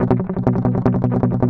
cln muted B guitar
Clean unprocessed recording of muted strumming on power chord B. On a les paul set to bridge pickup in drop D tuneing.
Recorded with Edirol DA2496 with Hi-z input.
160bpm, b, clean, drop-d, guitar, les-paul, loop, muted, power-chord, strumming